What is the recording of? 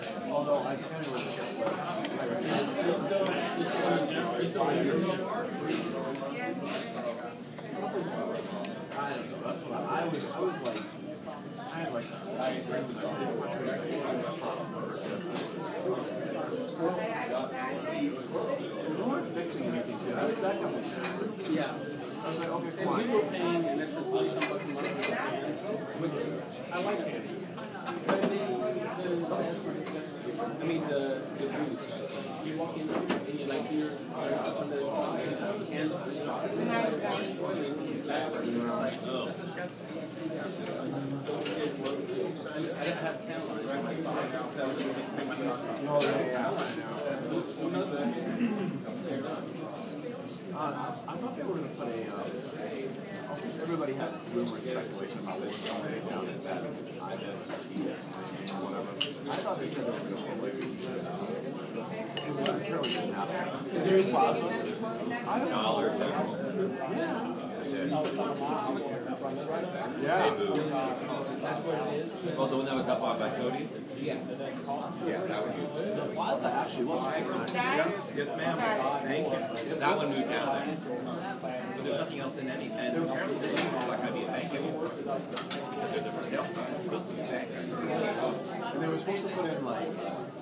Restaurant sounds. My iPhone left sitting on my table for 1:31 in a family restaurant in St. Petersburg Florida USA the evening of June 7, 2012. My egg plant was very good, thank you. LOL.
dining, dishes, eating, field-recording, people, people-talking, restaurant-sounds, silverware, voices